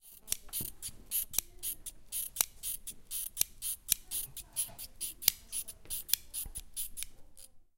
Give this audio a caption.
mySound MES Samsha
Barcelona, Mediterrania, Spain